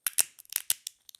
1240 walnut crack
Cracking a walnut with a nutcracker. Sony ECM-MS907, Marantz PMD671.